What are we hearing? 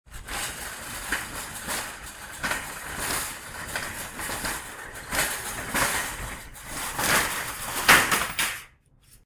Store Shopping Cart Being Pushed
clink, checkout, crinkle